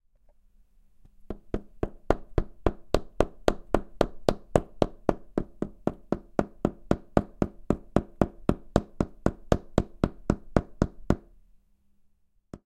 Rubber hammer banging panel
Rubber, hammer, drill